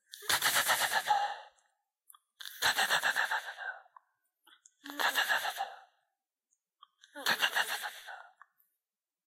Snake Tongue Hiss
I made these for a cartoon snake when its tongue was tasting the air.
snake, hissing, tongue, cartoon, hiss